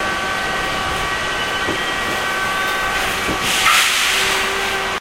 Subway train some sort of pneumatic air pulse, general hum.